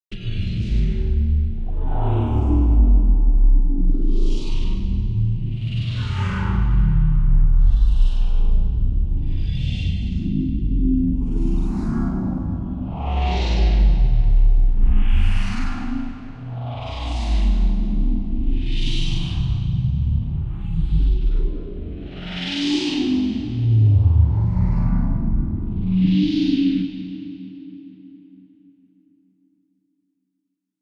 Sci-Fi Morph
This was one my various bass samples that I resampled in UVI Falcon. I used an interesting method where I had a very fast LFO just barely affecting the filter cutoff of a lowpass, and then I had a much slower LFO also on the cutoff moving it back and forth along with the tiny movements it was already doing. This created a really cool effect I had never made before, which seemed to go well with reverb and delay.